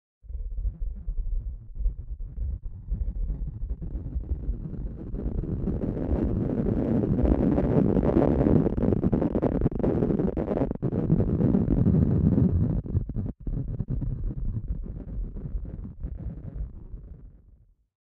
Watery Grainy
Swelling granulated breath sound. Filter applied to sound like underwater.
breath, dark, filter, grain, long, underwater